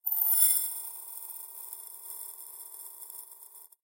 Rice pour
This is the sound of rice being poured into a pan/cup. Hope this comes in handy for someone doing foley for a cooking scene!